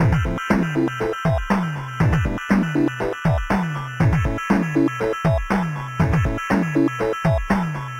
8 bit game loop 001 simple mix 3 short 120 bpm
bpm, 120, gamemusic, 8bit, electro, electronic, synth, 8, bass, 8-bit, loops, gameloop, mario, beat, free, gameboy, drum, bit, 8-bits, music, nintendo, sega, game, loop